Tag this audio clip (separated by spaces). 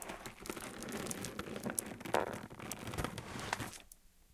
crackle creak wood squeak